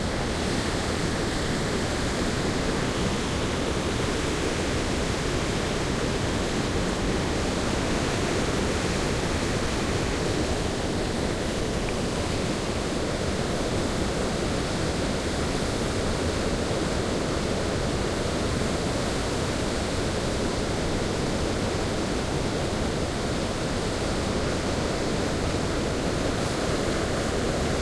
20090823.gulfoss.waterfall.01
at some distance, noise of the well-known Gullfoss waterfall, Iceland. Shure WL183, FEL preamp, Edirol R09 recorder
field-recording, waterfall, nature, water, iceland